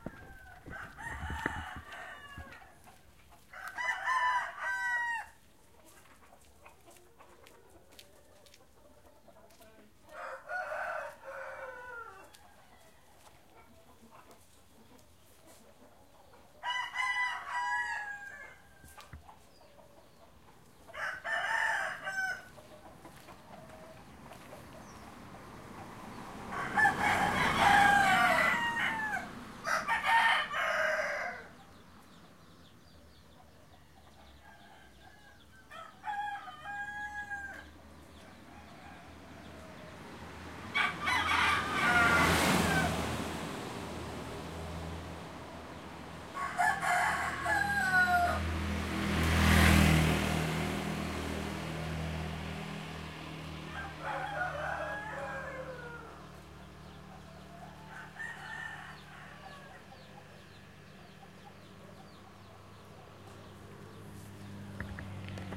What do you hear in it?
Roosters Ubud
Roosters recorded on JL Suweta in Ubud, Bali. Recorded with the internal microphones of the Zoom H4n.
H4n, bali, birds, field-recording, indonesia, roosters, urban